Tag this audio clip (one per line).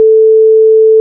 440hz
A
Sinus